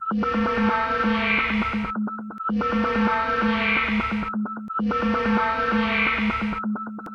Sci-Fi Alarm
Futuristic alarm sound, produced using distorted vocals and a synth loop
alarm, alert, future, ship